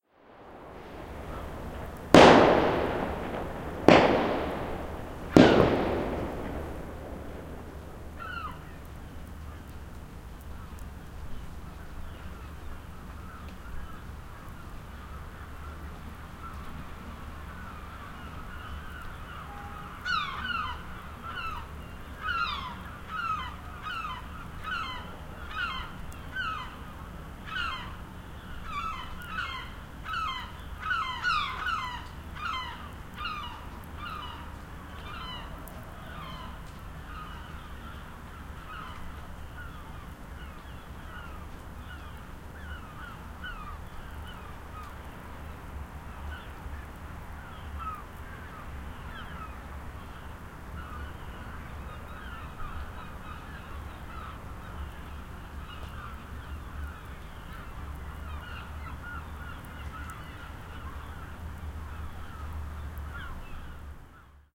3D DIY Binaural Dummy head recording.
Set up to record something else in light rain, someone let some fireworks off. (behind, ~1 mile )
Several loud bangs wake up some seagullls.(managed to catch the last three bangs on record)
Roland Quad Capture to PC